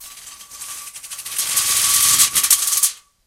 Shake and clatter metal sheet
variable, hits, scrapes, thumps, random, objects, taps, brush